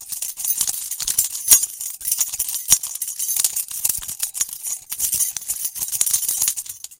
Sound created by shaking keys
keys, shake